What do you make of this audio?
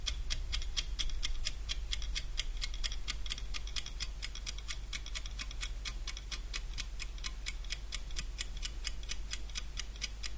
As requested: here is a test of some small condenser microphones.
For the test I used a Sony PCM-D50 recorder with the setting of 6 (only on the Soundman OKM II studio classic microphones was the setting on 7) and an egg timer, 15cm away from the microphones. These were spaced 90° from the timer (except the inside microphones of the Sony PCM-D50, which I had on the 90° setting.
Apart from the inside microphones of the the Sony PCM-D50 I used the AEVOX IM microphones and the Soundman OKM Studio classic, both of them binaural microphones, the Primo EM172 microphone capsuales and the Shure WL183 microphones.
Please check the title of the track, which one was used.
PKM-Studio, test, microphone, timer